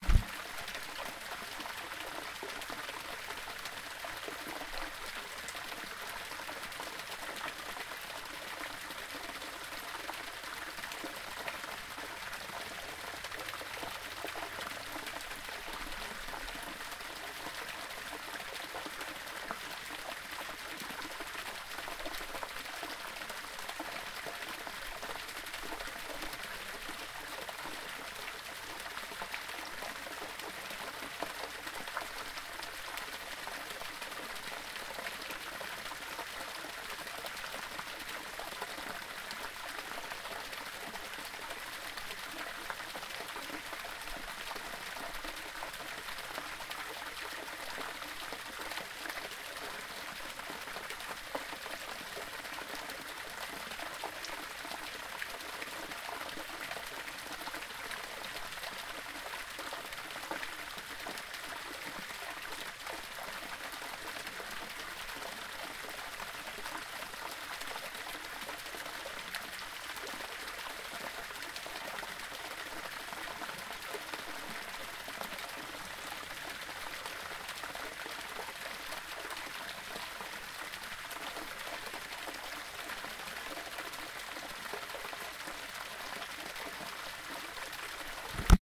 Little waterfall in the woods.